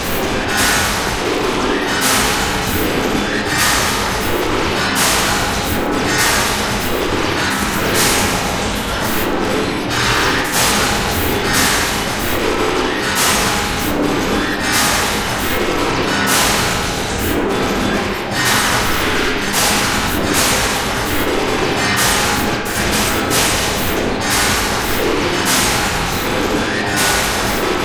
Big Broken Machine
Sounds like metal crashing together.
broken, clank, crash, destroy, factory, industrial, machine, machinery, mechanical, robot